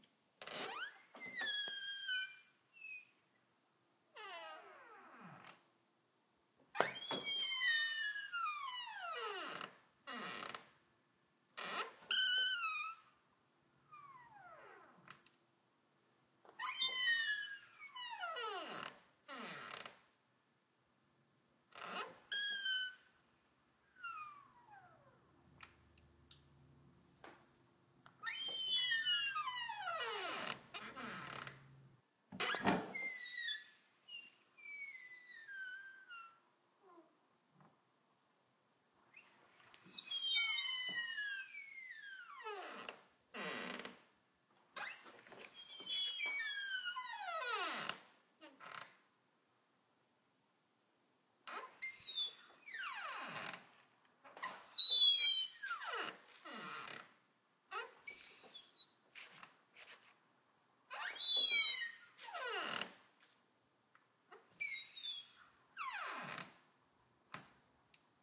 Julian's Door - open and close, hinge only
Swinging my door open and shut
close, creak, door, hinge, hollow, light, open, squeak, squeal, swing, wooden